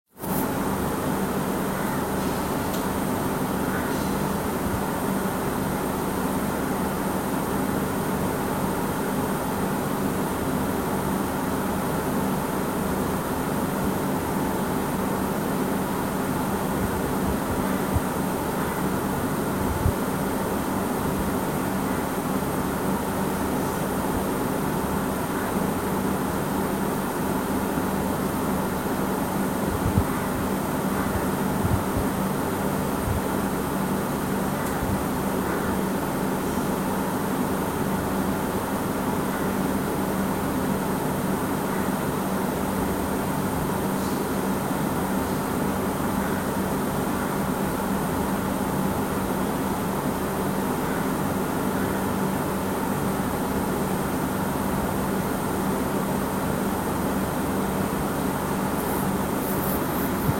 Room Tone - Empty room with AC & desktop computer running
Simple room tone recorded with my cheap android phone in an empty room, with the AC and a desktop computer running in the background.
AC, hum, room-tone, background, room-noise, ambience, room, empty, roomtones, roomtone, background-sound, desktop, computer, general-noise, ambient